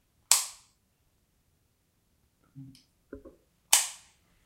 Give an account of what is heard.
Switching lights on, and off
kitchen, switch, light